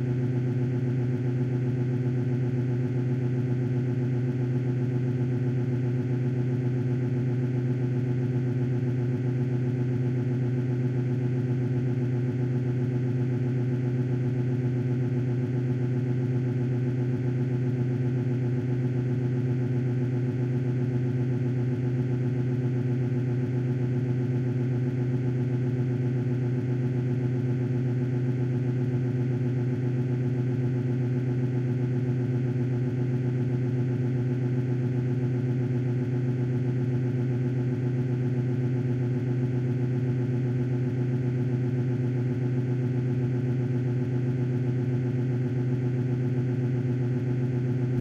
Quiet Car Motor
The sound of a newer car in idle